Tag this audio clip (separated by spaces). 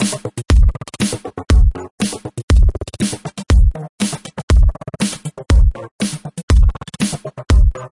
120 Drum Loop synth